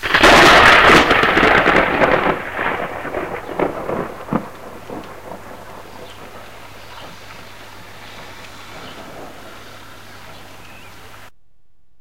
Lightning strike nearby in approx one kilometer distance. I have
recorded it with a cassette recorder and a mono microphone in a short
storm in the hot summer of 2005 June.
bolt, horror, lightning, thunder, thunderclap